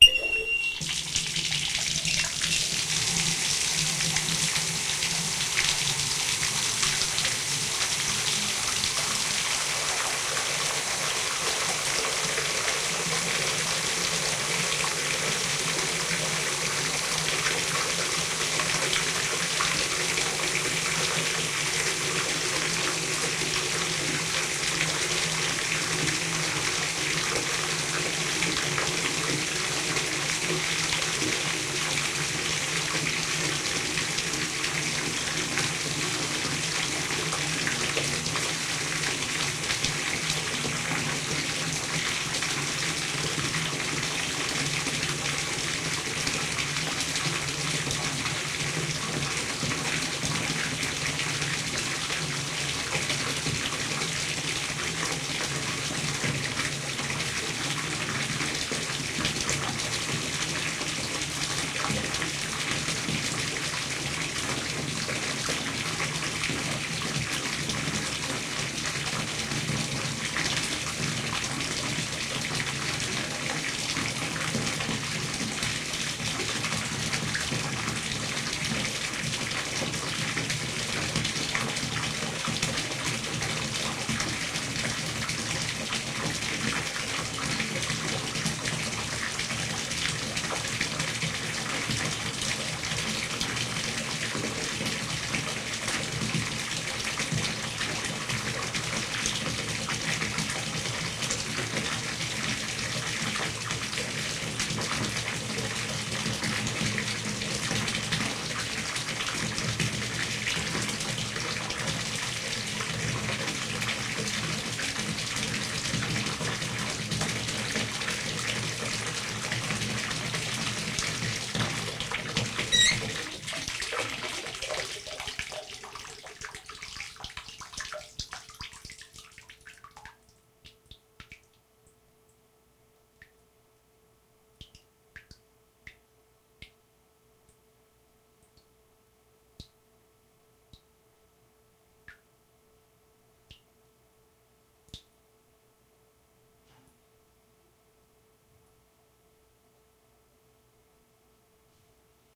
Filling up a bathtub with water.